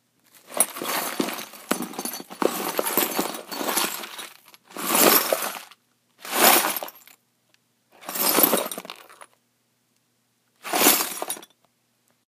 Glass-Plate Crunching
A plate broke in the house so to avoid trouble we taped it up inside a box...course once it rattled a bit I couldn't help myself.
Recorded on an iPhone 6.